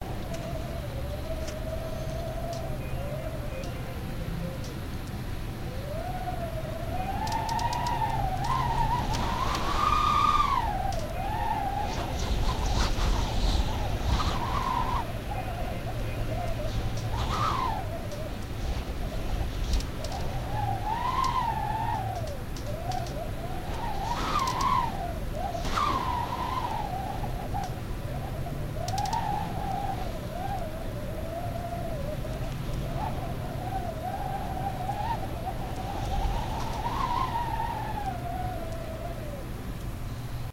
Wind Howling thru window crack
Sound of wind whistling thru sliding window that doesn't seal well.